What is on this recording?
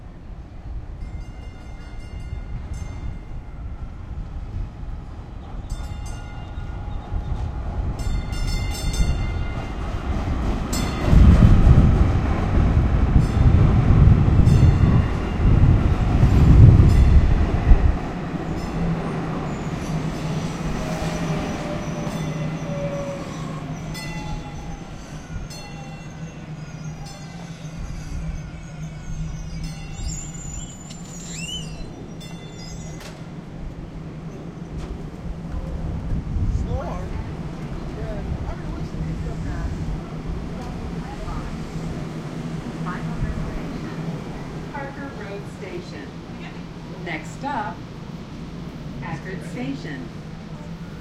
nftp wstend 0408 TrainPass Departure
This is part of the Dallas-Toulon Soundscape Exchange Project; Location: West End DART Station; Time:12:00PM ;Density: 4 Polyphony: 4 Chaos/order: 4 Busyness: 7; Description: Going home. Train is coming around the corner, and just passed us. Walking to door, transition from outside train to inside. Train is noticeably less crowded than the ride here.
dallas, dart, station, train